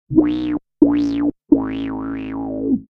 misslyckad bana v2
Korg M-20 5 Down ward tones. Negative answer.